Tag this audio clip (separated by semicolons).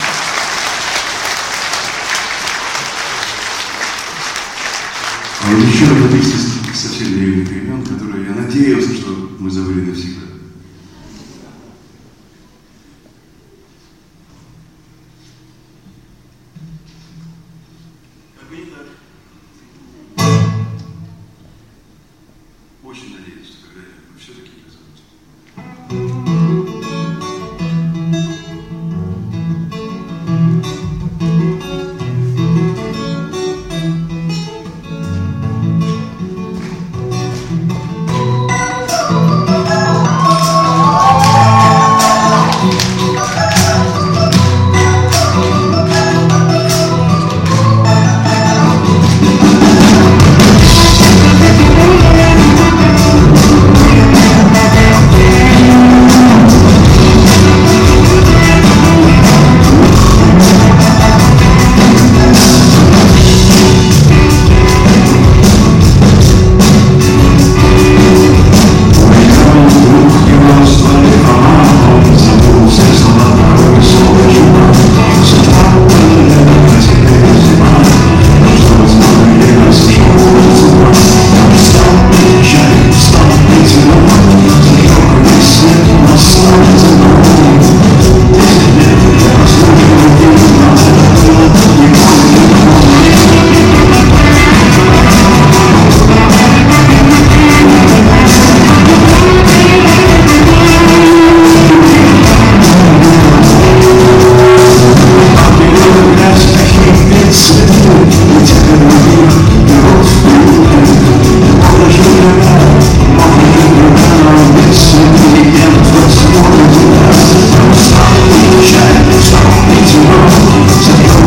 Galaxy-Nexus; rumble; concert; live-record; phone-record; Boris-Grebenshikov; wheeze; Grebenshikov; live